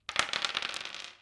A dice roll from a series of dice rolls of several plastic RPG dice on a hard wooden table. Dropped from a little bit higher than the first one. Recorded with a Sony PCM M-10. I used it for a mobile app.
roll, recording